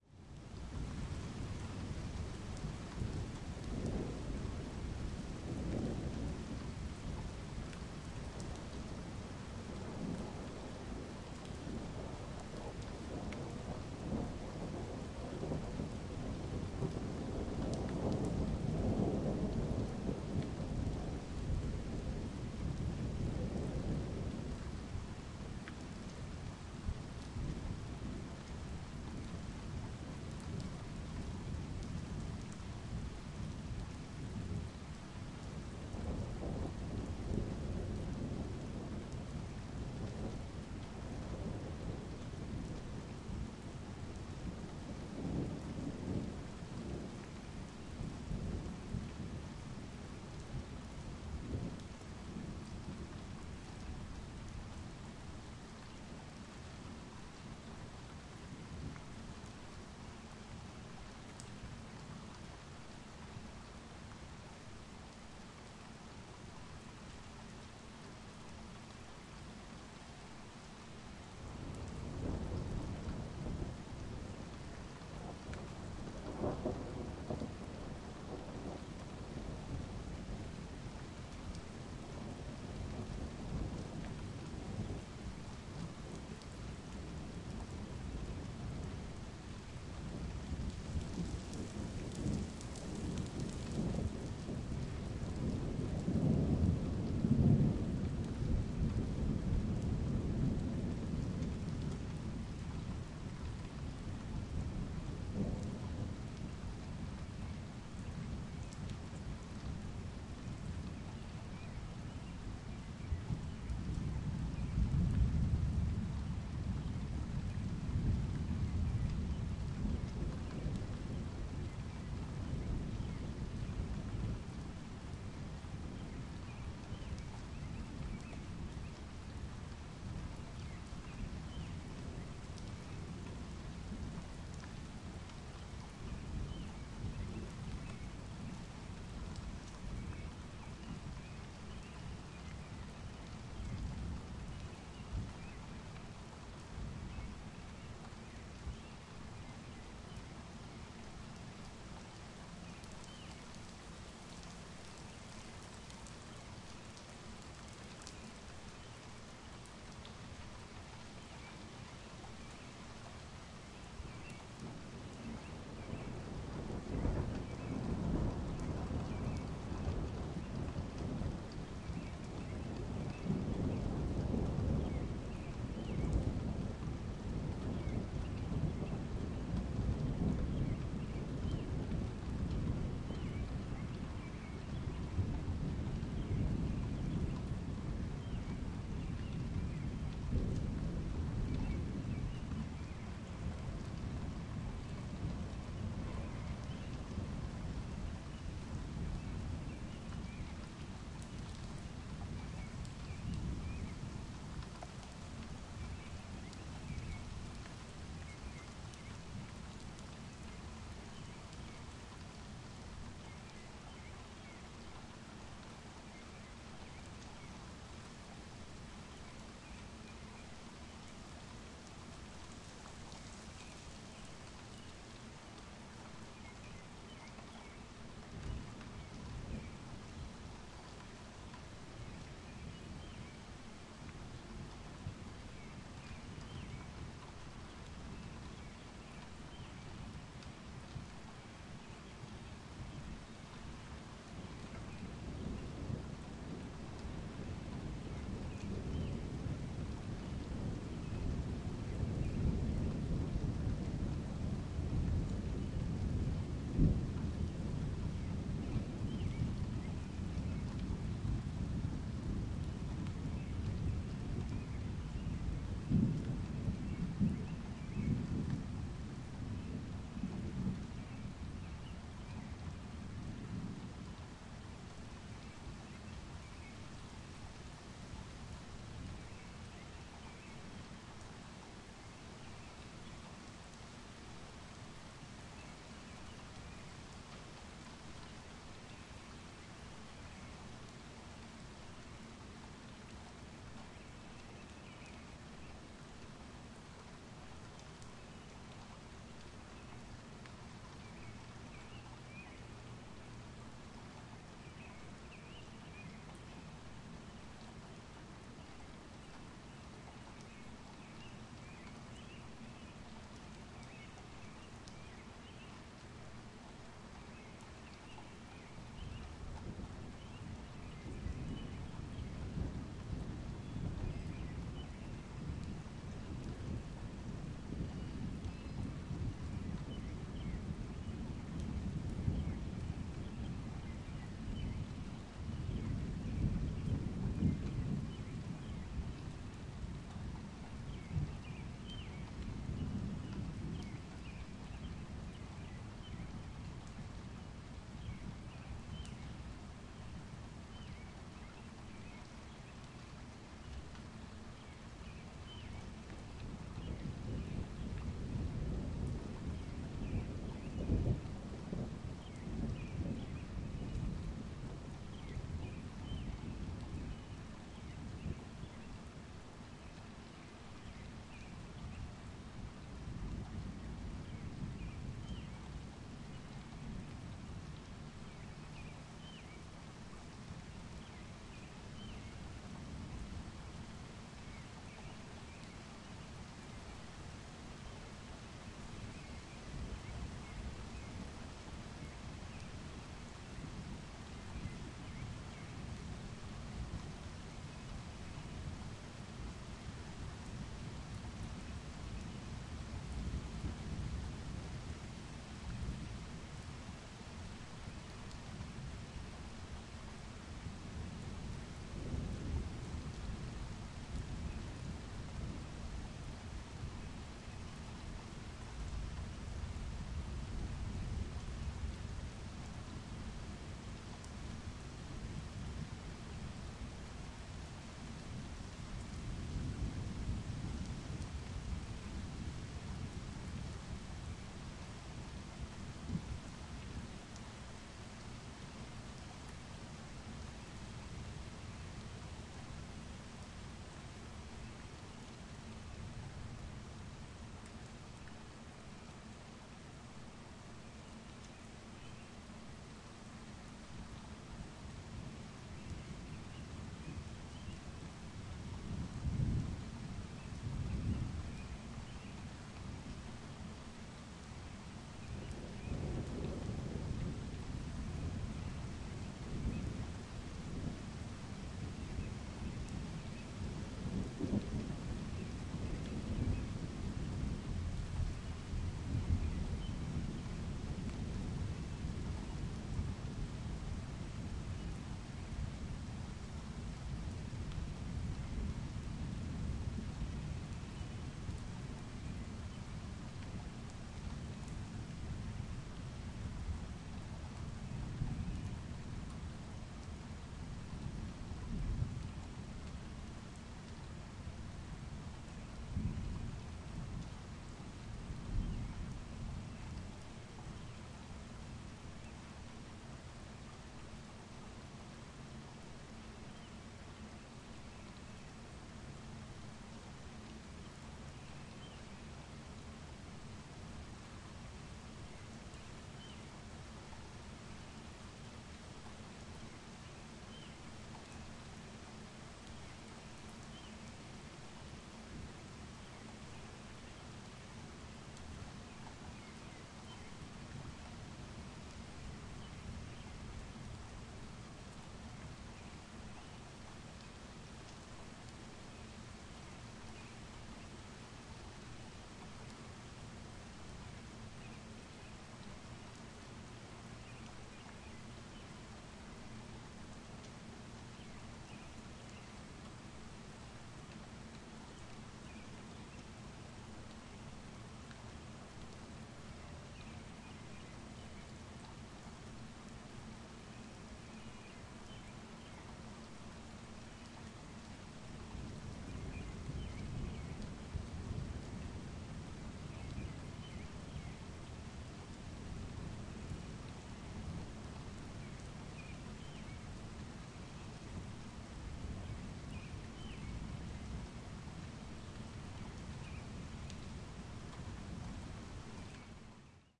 AE0078 Light summer thunderstorm at dawn
This dawn thunderstorm was emitting regular, though unusually quiet rolling thunder as it passed over. A bird chirps nearby throughout and a neighbour's wind chimes ring occasionally. Recorded using a Zoom H2.
bird, dawn, drizzle, field-recording, morning, outdoors, rain, storm, thunder, weather, wind-chimes